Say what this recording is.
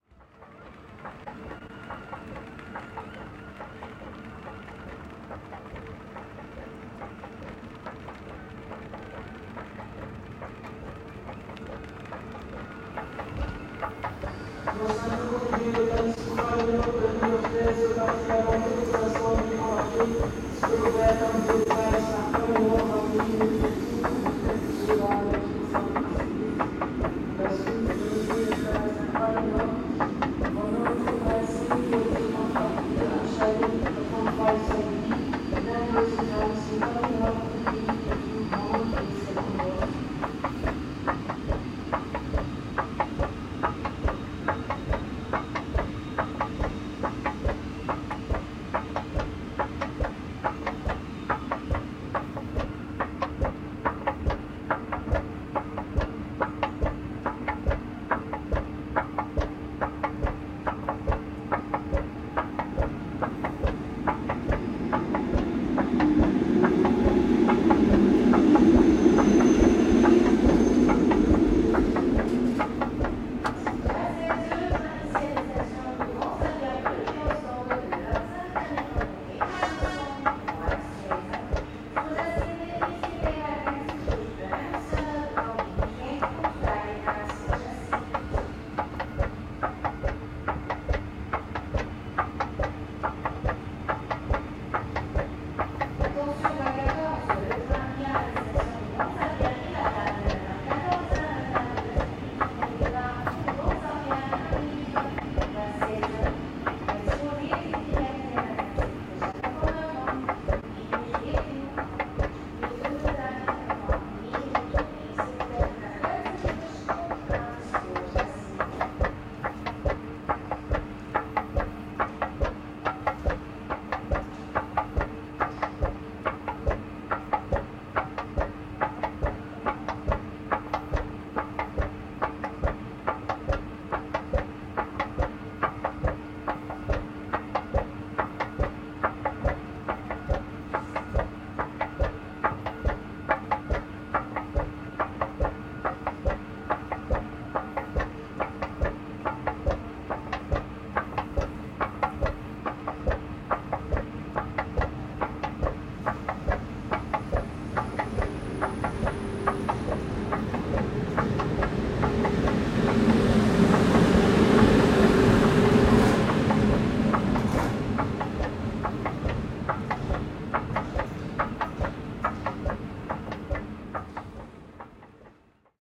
ESCALATOR BRUXELLES MIDI
Escalator running, recorded at Brussels train station « Bruxelles-Midi », Belgium, 2013/4/17 with a Zoom H4n, amazing rythm.